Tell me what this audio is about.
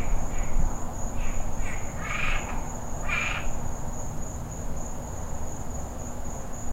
Another frog chirping.
night, frog